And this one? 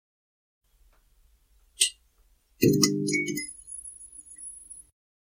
Fluorescent lamp start 5
fluorescent tube light starts up in my office. Done with Rode Podcaster edited with Adobe Soundbooth on January 2012
fluorescent, light, office, start, switch